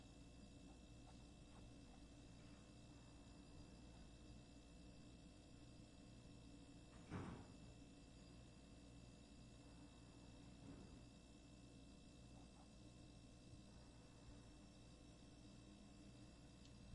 Fridge Compactor
It's working overtime
fridge, motor, vroom